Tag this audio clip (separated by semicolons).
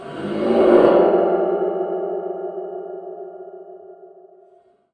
alert; audio; ball; big; bizarre; cd; cell; compact; contact; converters; cool; cup; dark; design; disc; dream; dreamlike; echo; edit; enormous; evil; frontier; gigantic; group; hand; hands; huge; impact; impulse; industrial